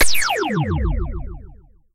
This is a laser gun shooting.
Gun, Laser, Shoot, Shot, Sound